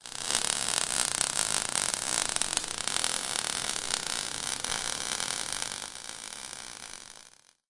A welding torch heating the edge of a steel plate. This sample has more of the actual noise the torch is making rather than the noise coming from the steel plate.